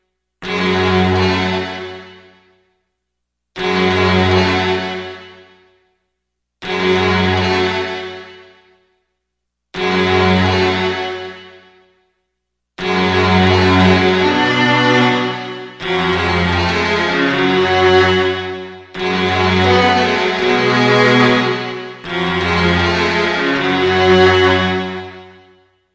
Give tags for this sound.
analog; cinematic; DCDNT; intro; strings

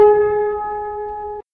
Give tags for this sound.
piano-notes; notes; keyboard-notes; note; piano-note; keyboard; g-sharp; keyboard-note; g

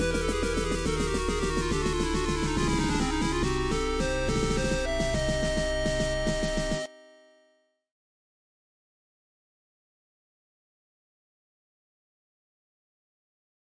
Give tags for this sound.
Musical; snare; sound-effect

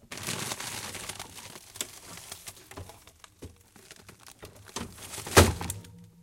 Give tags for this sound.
paper; cloth; crumple

